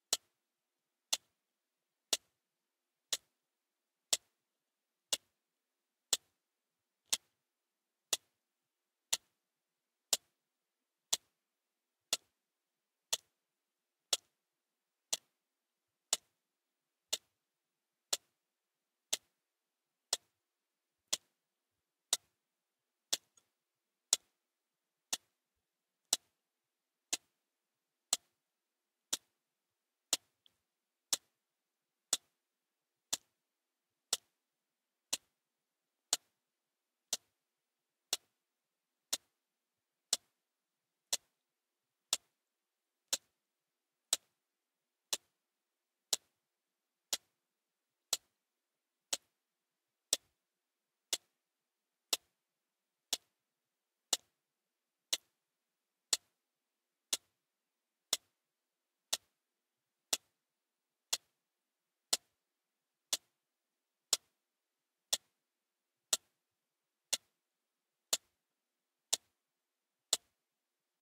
a recording of a child's wrist watch.
Piezo-> ULN-2->TC SK48.